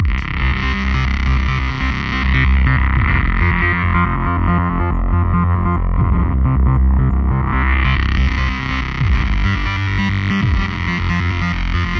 Korg A21 Amped Lead
Hard driving synth lead recorded from a MicroKorg (preset A21) with the cutoff tweaked but otherwise unprocessed. Strong raw arpeggiated sound that rises and falls, may have a blip at the end and require fadeout processing.